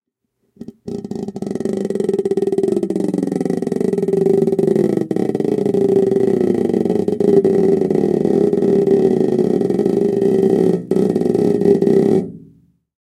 Spring Drum (1)
slow pull on spring using thumbnail
drumhead, spring-drum, spring